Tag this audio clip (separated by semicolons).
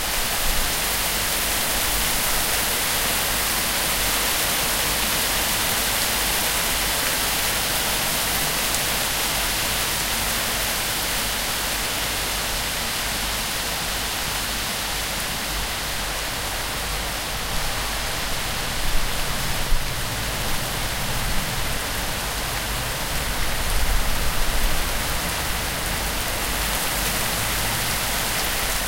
drip; dripping; hail; ice; pour; pouring; rain; snow; storm